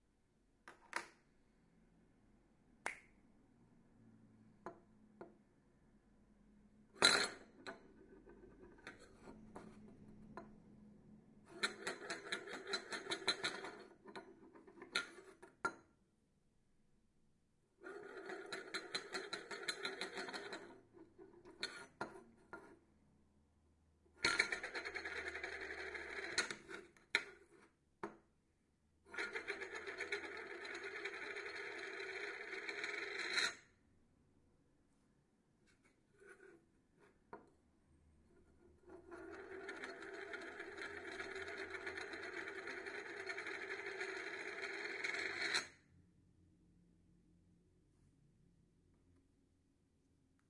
raw recording of a spinning lid.